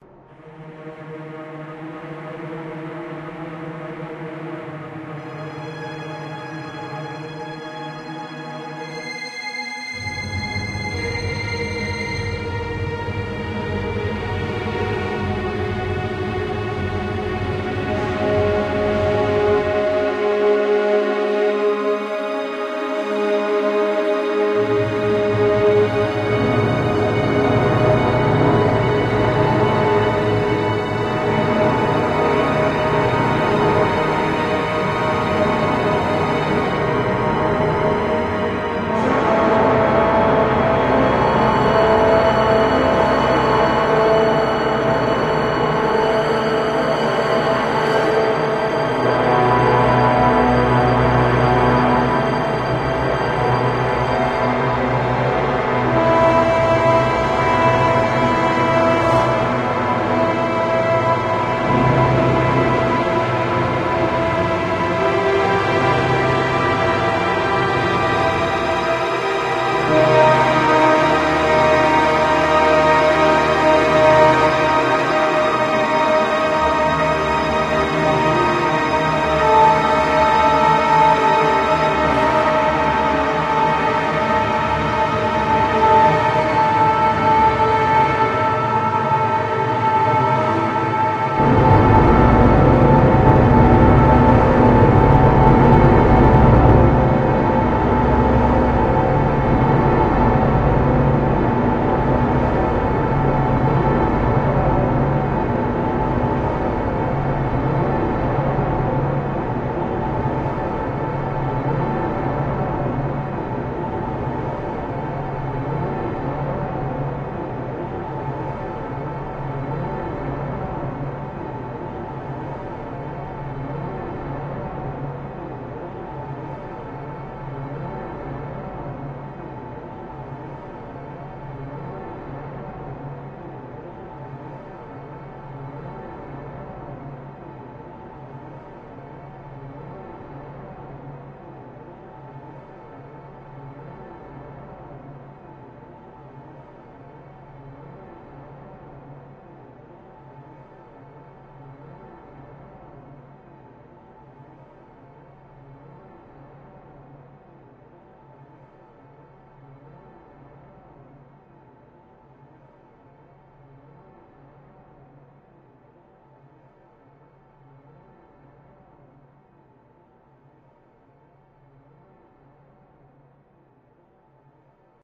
Here's a bit of excessively dramatic music that might make good entrance music or something. Who can say? Anyway, it's done know. Make of it what you will.